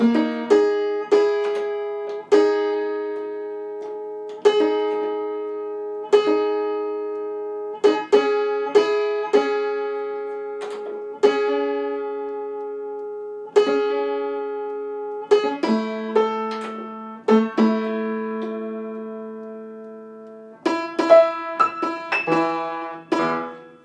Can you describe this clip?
Piano tuning, bringing two or three strings into unison.